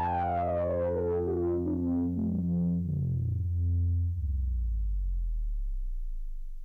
A series of sounds made using my wonderful Korg Monotron. These samples remind me of different science fiction sounds and sounds similar to the genre. I hope you like.
Electronic, Futuristic, Korg, Machine, Monotron, Space, Space-Machine